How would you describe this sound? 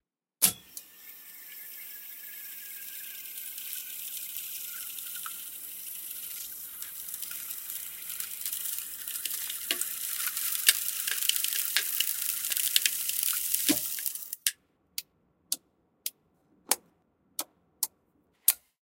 Rewinding Reel to Reel Tape Machine
This is a recording of an old reel to reel tape machine rewinding.
Equipment:
Midside setup 2x Schoeps CMC 5U with Schoeps MK4 & MK8 capsules
Sound Devices 744T
analog, lo-fi, noise, old, reel, rewind, tape, vintage